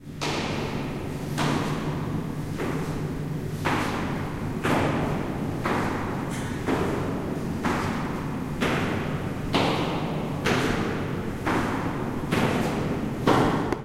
You can hear comeon walking though a corridor that has a lot of reverberation. It has been recorded in a corridor at Pompeu Fabra University.